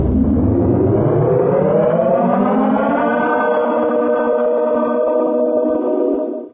Mangled snippet from my "ME 1974" sound. Processed with cool edit 96. Some gliding pitch shifts, paste mixes, reversing, flanging, 3d echos, filtering.
voice, stereo, human, processed, child